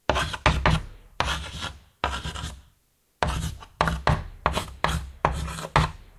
Writing on black board
I have recorded this sound on a blackboard
The sound has been clean using soundforge
blackboard, chalk, writing